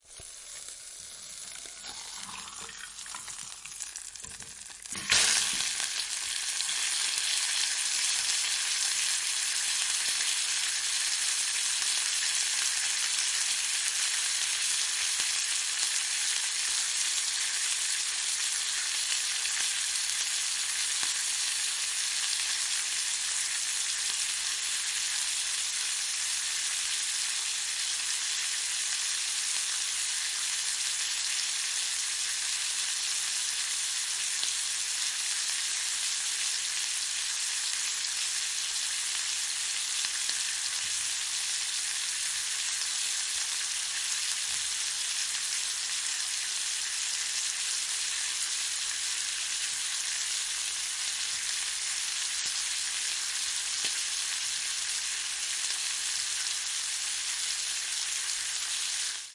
frying steak
Dropping a steak on a hot frying pan, sizzling.